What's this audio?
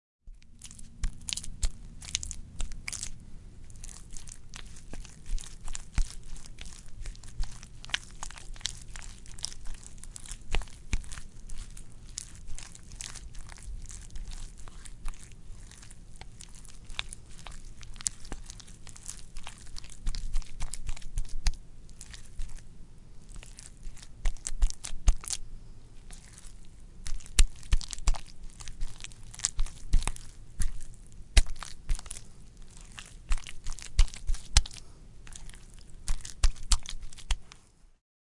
Wet Squishy sound
The sound of some nice, warm, moist oatmeal. Recorded on an MXL 990 condenser mic in a closet, and then normalized in reaper.
gross, moist, splat, squishy, wet